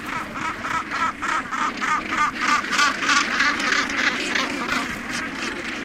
A Gannet cries as he approaches the nest. Recorded in the famous breeding colony at Bonaventure Island, Gaspé Peninsula, Quebec, using two Shure WL183 capsules, Fel preamplifier, and Edirol R09 recorder.